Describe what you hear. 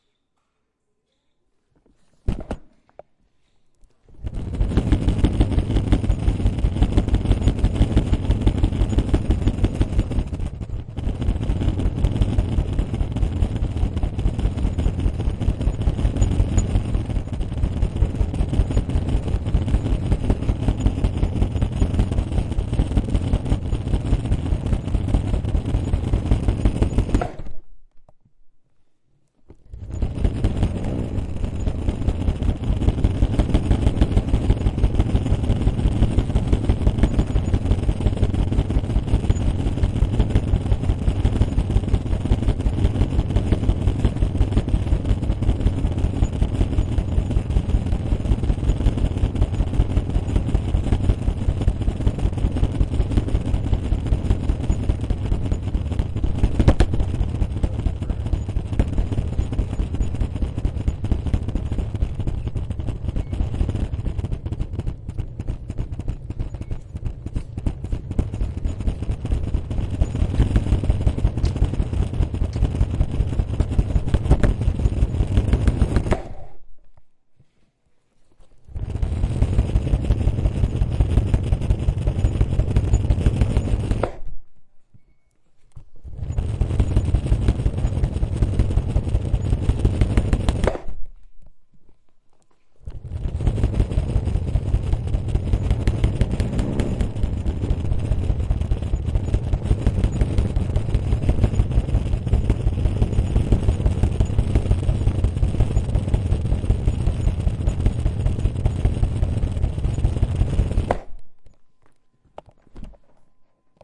Luggage rolling2
Suitcase rolling on a tile floor with random stops. enjoy!
tiled, suitcase